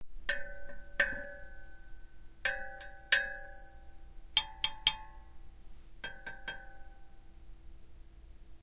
stainless steel pole beating